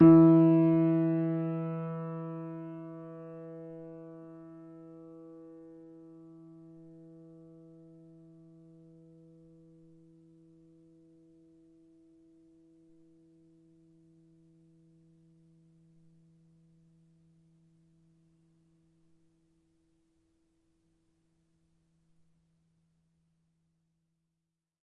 upright choiseul piano multisample recorded using zoom H4n